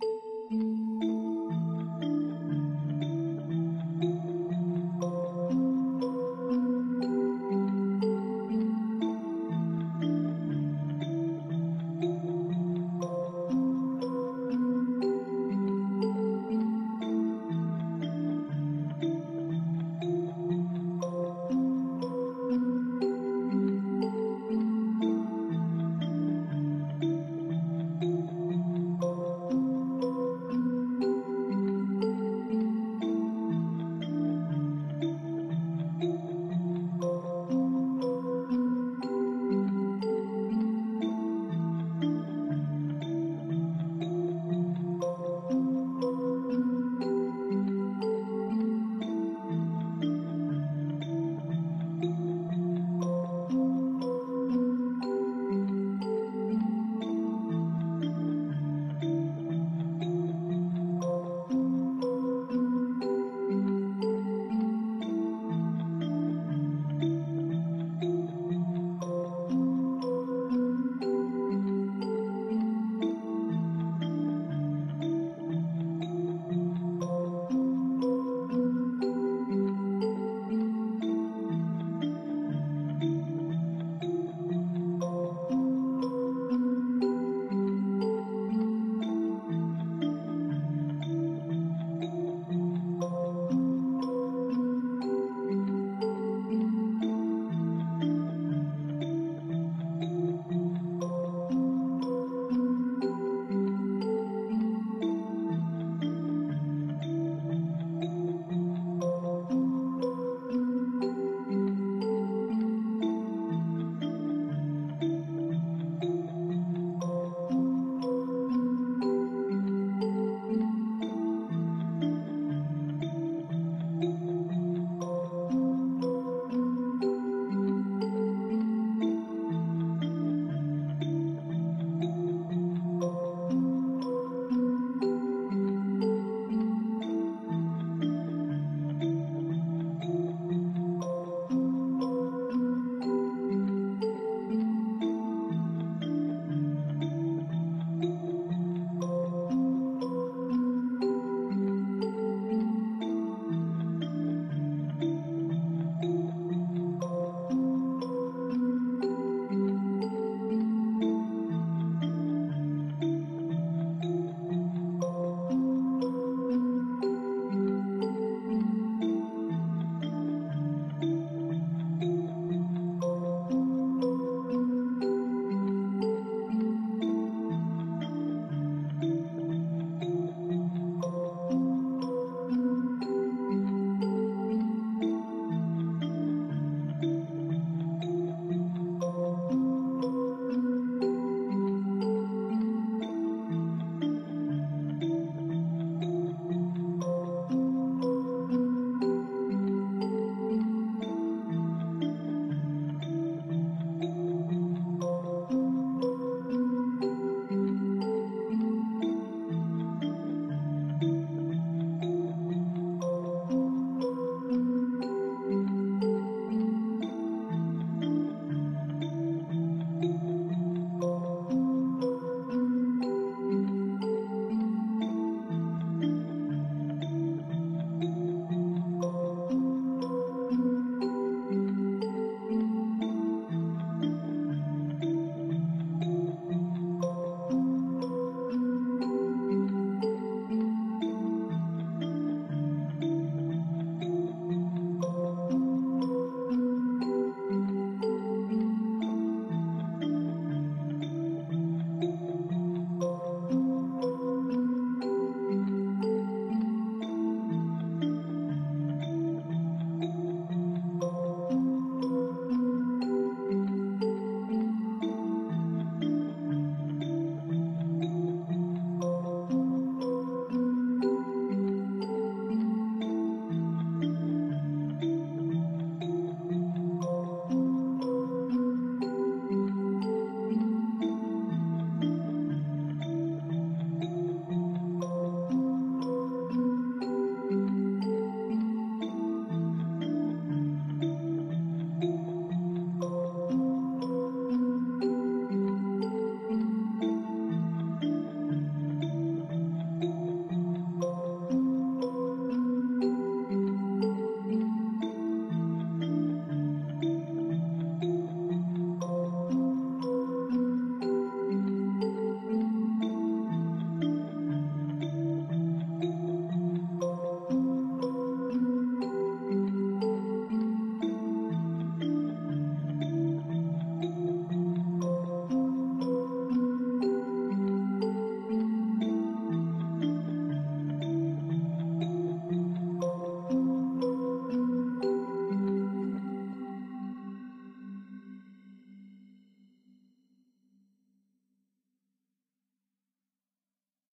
Calming/Relaxing Ambience Music Loop
120 BPM Ambience sound/music.
Created with FL studio with some VST
Loop, Ambience, Music, atmosphere